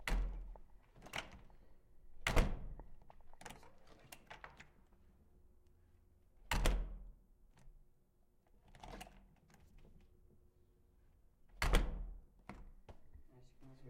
a wooden door closing
closing, door